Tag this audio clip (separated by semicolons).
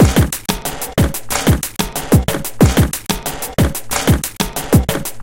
percussion-loop; loop; drumbeats; idm; drumloop; beats; breakbeat; drum-loop; quantized; loops; braindance; electronica; groovy; rhythm; beat; drum